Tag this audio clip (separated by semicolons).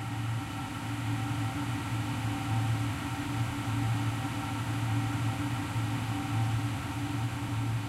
boiler; industrial; machinery